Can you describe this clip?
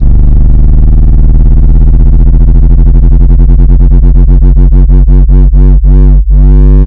sub-wobble-up-g1
Sound effect or weird sub bass wobble down loop. 4 bars in length at 140 bpm
I used audacity to generate two sine wave tones both 49 or G (g1). On one I applied a sliding pitch shift down a full octave. For some reason this creates a nice wobble down. I then reversed it to make a low frequency wobble up.